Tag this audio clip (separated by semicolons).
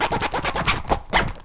scratch; sounds; 0; natural; zipper; egoless; vol; noise